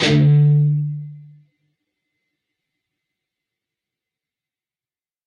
Dist Chr Drock pm
A (5th) string 5th fret, D (4th) string 7th fret. Down strum. Palm muted.
distorted-guitar, guitar-chords, guitar, rhythm-guitar, distortion, rhythm, distorted, chords